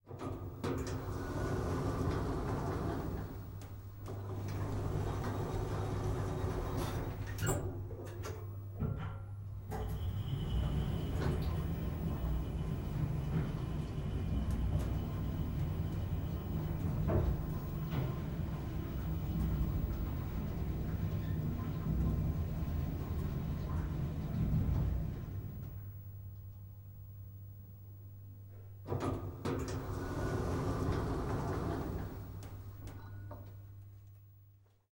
Elevator going up with opening and closing door recorded with zoom f8 and sennheiser mke600
Open, Door, Home, Up, going, Closed, Elevator, Office